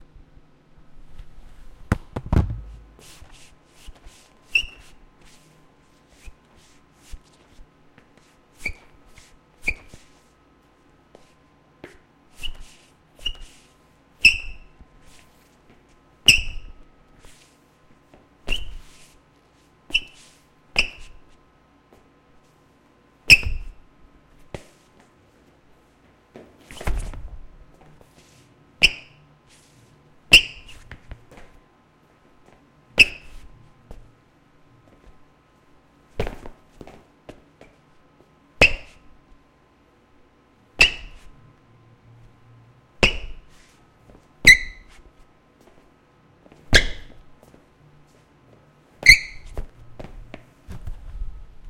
sneaker skid on floor